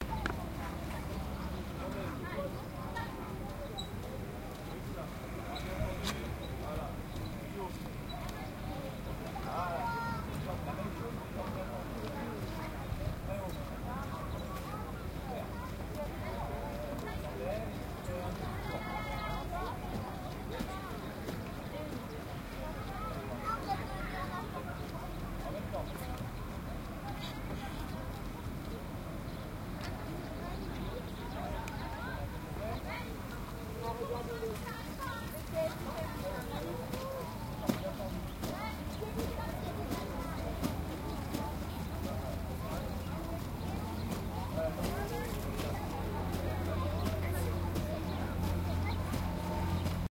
Beach French 2
beach, field-recording, seaside, france